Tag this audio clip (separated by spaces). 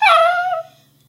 animal bark barking chihuahua cry dog dogs growl howl pet puppy whimper whine yelp